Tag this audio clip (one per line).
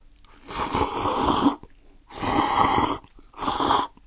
Asia
eat
Japan
Japanese
male
noodles
ramen
soba
udon